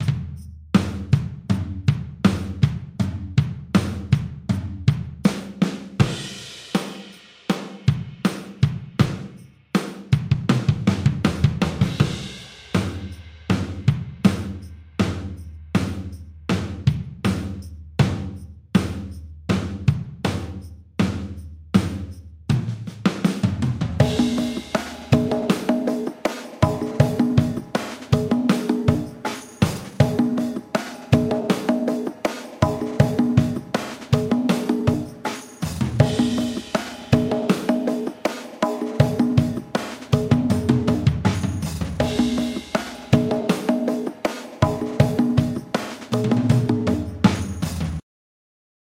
Midnight Drum 88bpm
Slow heavy rock drums 88bpm. good slow rock jams. Drums with add congos
88bpmm, congo, heavy, rock, Slow